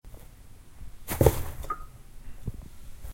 Guinea pig is running inside his cage